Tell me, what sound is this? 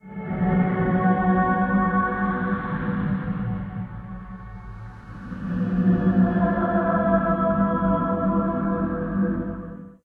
Creepy dream call
a distant distorted voice calling
creepy, scary, voice, call, dream, horror, distorted